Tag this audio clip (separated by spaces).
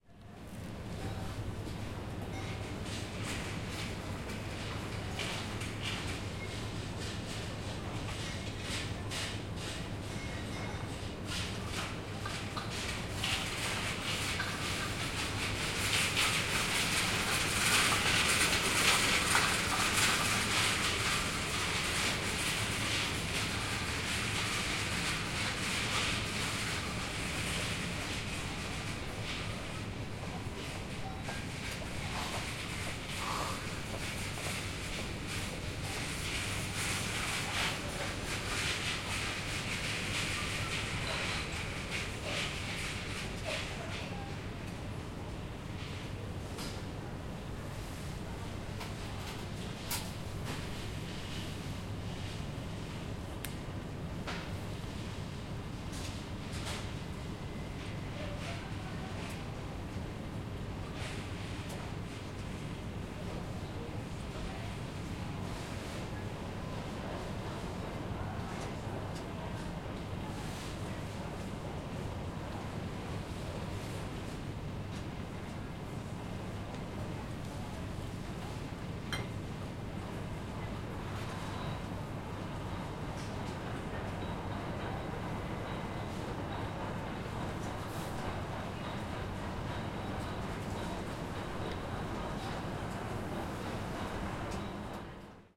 trolley Shopping-mall ventilation